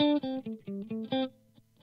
electric guitar certainly not the best sample, by can save your life.
electric, guitar